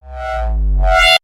A strange digital tearing noise with some bass in there too. Weird glitchy fun from my Nord Modular.